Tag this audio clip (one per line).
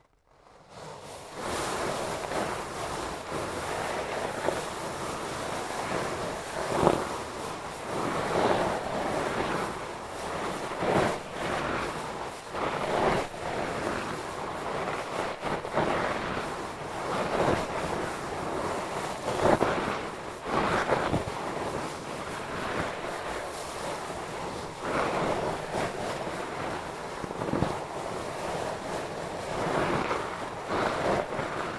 gravel
sliding